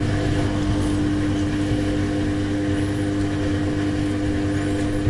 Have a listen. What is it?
KitchenEquipment WashingMachineLoop Stereo 16bit
washing machine loop
16, bit